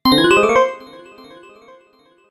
I made these sounds in the freeware midi composing studio nanostudio you should try nanostudio and i used ocenaudio for additional editing also freeware
game, bleep, bootup, blip, desktop, sound, event, application, sfx, click, intros, startup, effect, intro, clicks